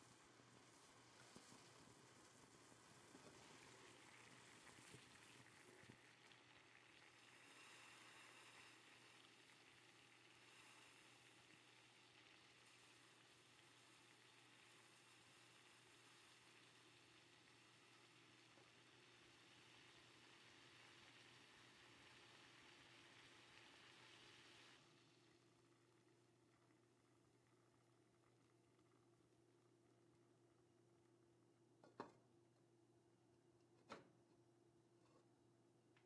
Boiling water (Xlr)
elements, xlr, water